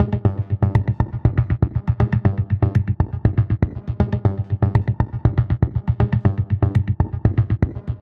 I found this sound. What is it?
Rhythmic loop made with several delays in Numerology